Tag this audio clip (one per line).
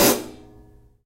avedis
drum
heavy
hihat
kit
metal
zildjian